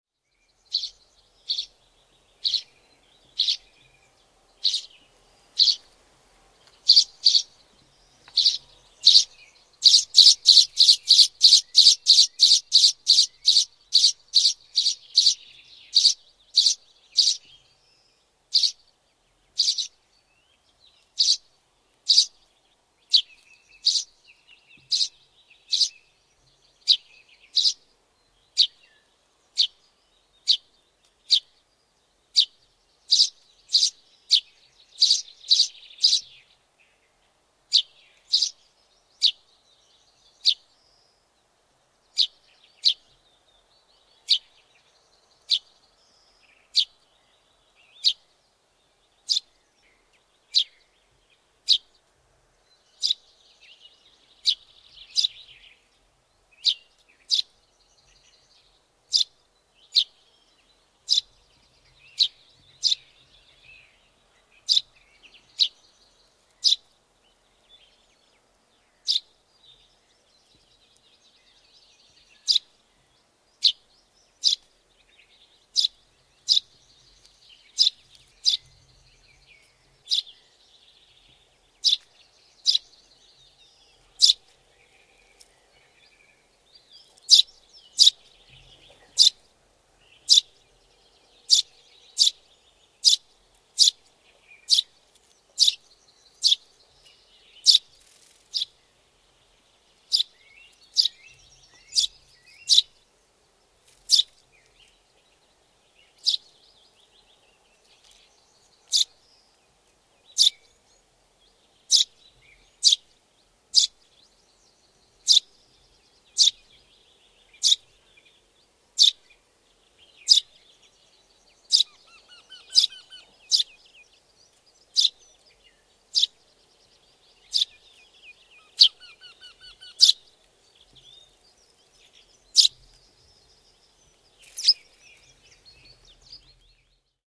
Pikkuvarpunen, tirskutus, parvi / Tree sparrow (Eurasian), small flock twittering, chirping (Passer montanus)
Pieni parvi pikkuvarpusia tirskuttaa ja ääntelee (Passer montanus).
Paikka/Place: Suomi / Finland / Parikkala
Aika/Date: 11.05.1998
Bird, Birds, Chirp, Chitter, Field-Recording, Finland, Finnish-Broadcasting-Company, Flock, Linnut, Lintu, Luonto, Nature, Parvi, Sirkuttaa, Soundfx, Spring, Suomi, Tehosteet, Tirskuttaa, Tree-sparrow, Twitter, Yle, Yleisradio